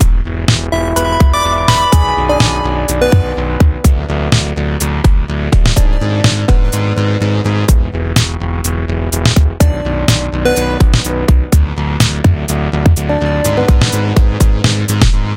Bileda Lofe
125bpm, E, mixolydian